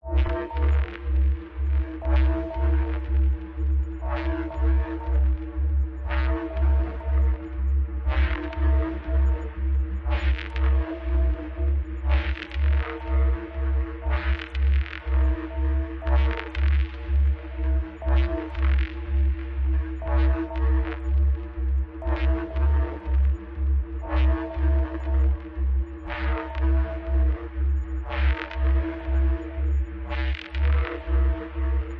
120 bpm C Key Low Bass 02
synth, bpm, bass, beat, loop, experimental, low, distortion, noise, c, electro, rhythmic, design